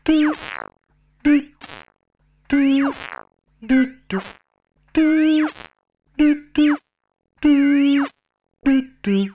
TUu tutu
First try, homemade_vocoder.
homemadevocoder, vocodervice1